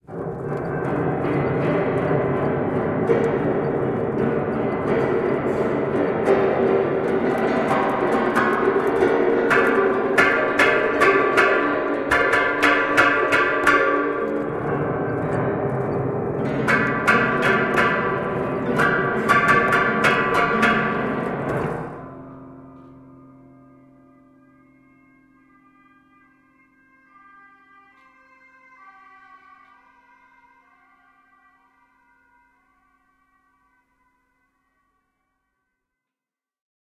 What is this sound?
Detuned Piano Dissonant Waves 1 Stabs and Emergency Vehicle Siren.
A whole bunch of broken upright piano samples recorded with Zoom H4N. Coincidentally, an emergency vehicle passed by with its sirens on, outside but very closeby.
siren, improvised, police, detuned, old